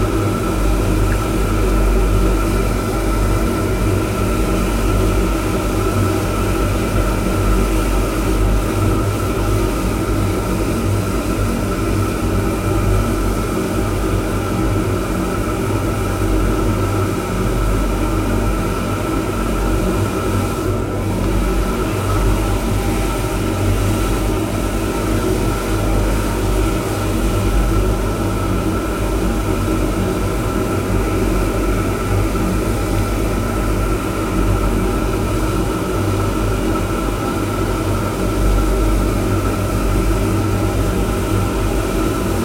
construction tar heater bassy3
bassy, tar, heater, construction